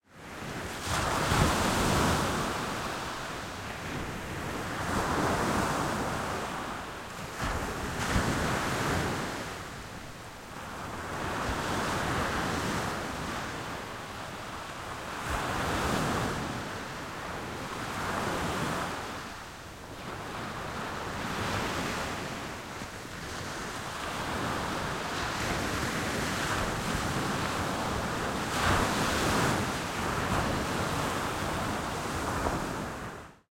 Recorded near Pattaya beach with Rode iXY a bit far away from the beach.